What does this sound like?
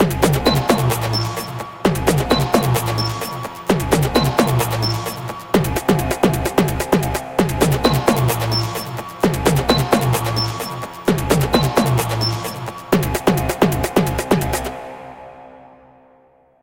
muvibeat2 130BPM
made with vst instruments
background, beat, scary, background-sound, drama, drone, ambience, deep, dramatic, sci-fi, suspense, dark, movie, spooky, film, hollywood, horror, trailer, music, cinematic, thriller, soundscape, atmosphere, mood, pad, ambient, thrill